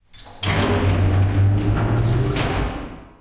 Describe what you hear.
A scary, mecanical, big elevator I created with multiples plugins and layers of my lift recordings.
The beginning of the elevator sound.